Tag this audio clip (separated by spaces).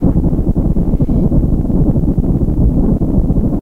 noise effect organic weird odd electronic cinematic effect-sound FX muffled loop